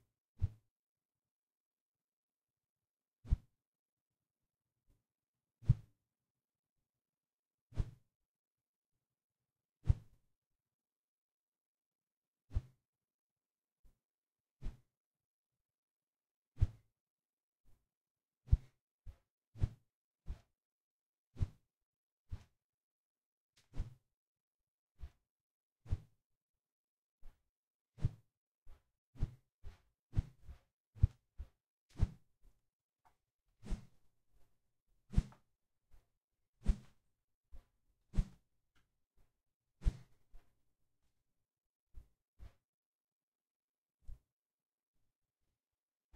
Broom Handle Swish
Recorded for a show where I needed a sound for a magic wand.
wand, handle, air, swish, broom